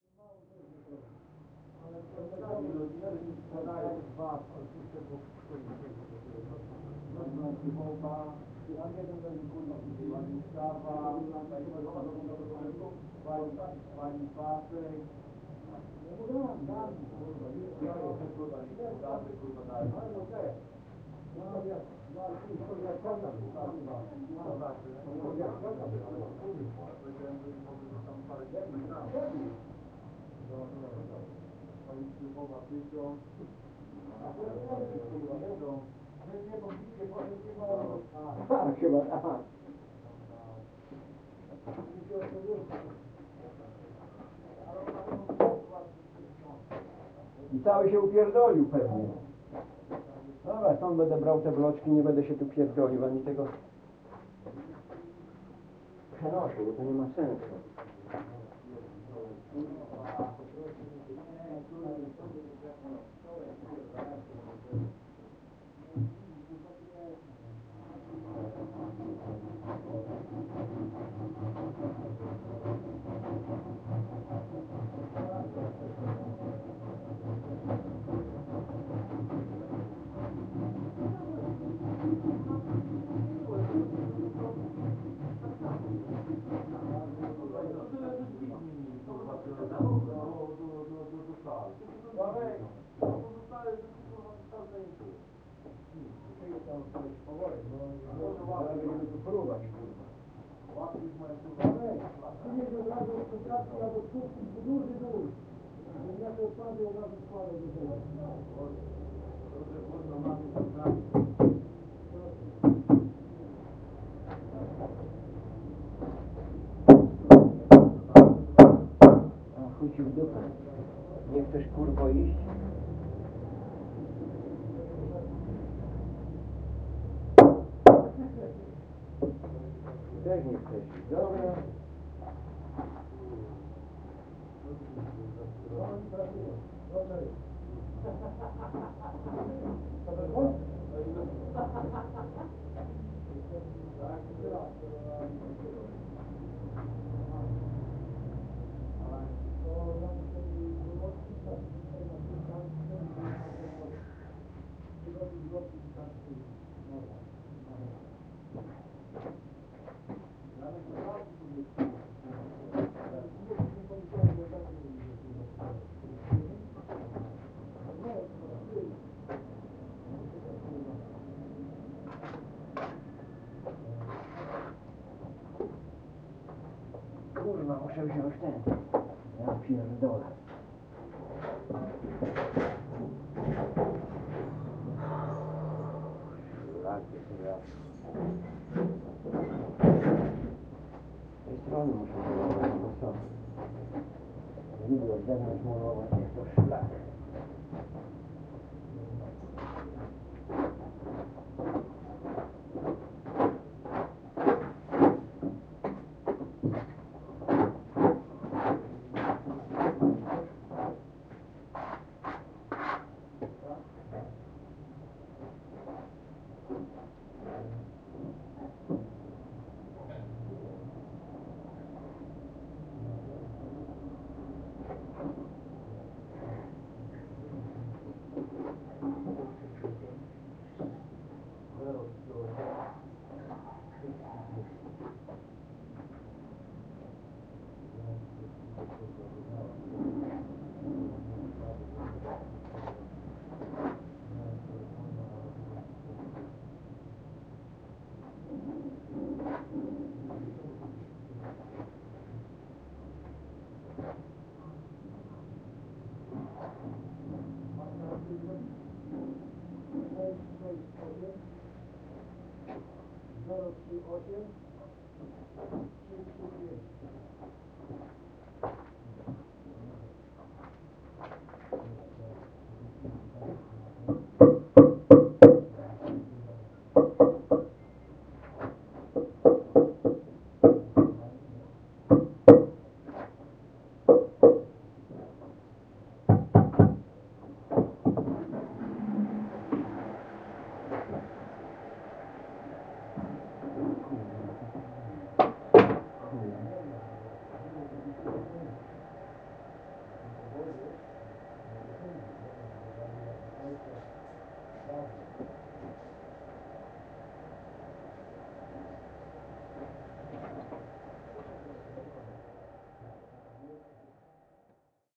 11.09.2015: around 13.00 p.m. Fieldecordnig made during the ethnographic research on the national road no. 92 in Torzym (Poland). Sounds of the renovation of Chrobry Motel in Torzym. Recorded by closed window.